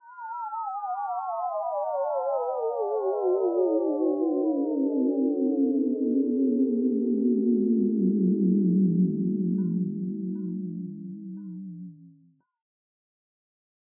Retro ufo landing
synthetized in serum
descending, landing, oldschool, retro, sci-fi, serum, ship, sine, space, spaceship, ufo